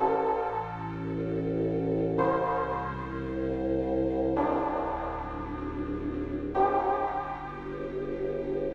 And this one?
bassline synth 110bpm-02
bassline synth 110bpm
110bpm, bass, bassline, beat, club, dance, electro, electronic, hard, house, loop, progression, rave, synth, techno, trance